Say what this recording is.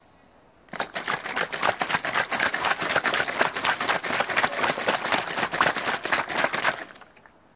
Liquid bottle shaking long
Liquid shaking sound
Liquid, bottle, can, shaking, water